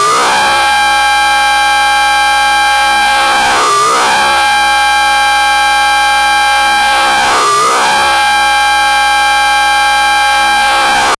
quantum radio snap127
Experimental QM synthesis resulting sound.